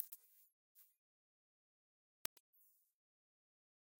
high frequencies G#5
This sample is part of the "K5005 multisample 20 high frequencies"
sample pack. It is a multisample to import into your favorite sampler.
It is a very experimental sound with mainly high frequencies, very
weird. In the sample pack there are 16 samples evenly spread across 5
octaves (C1 till C6). The note in the sample name (C, E or G#) does
indicate the pitch of the sound. The sound was created with the K5005
ensemble from the user library of Reaktor. After that normalizing and fades were applied within Cubase SX.